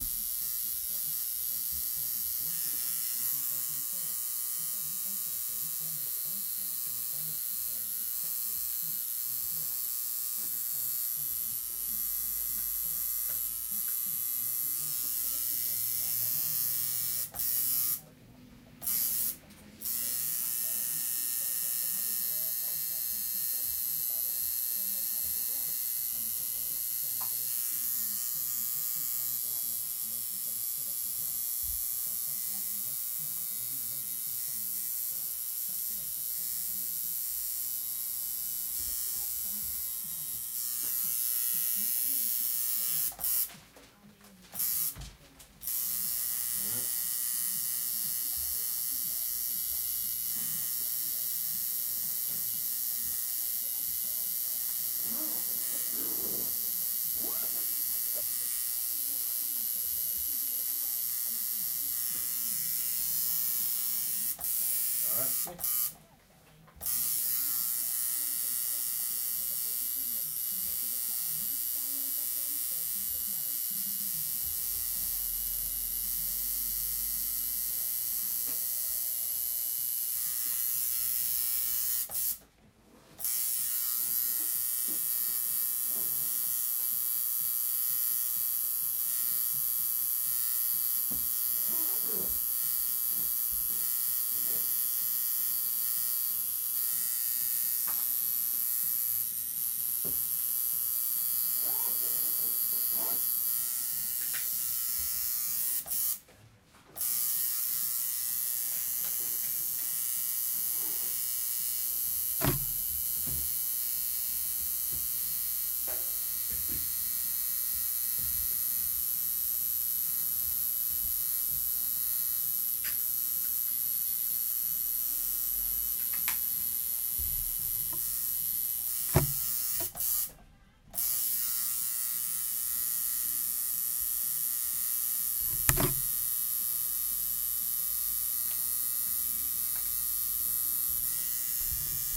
Tattoo Gun
A recording of someone being tattooed the noise of the gun